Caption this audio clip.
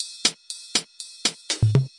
hihat closed hi-hat open hi-hats Loop hihats hat Dubstep step drums hats cymbals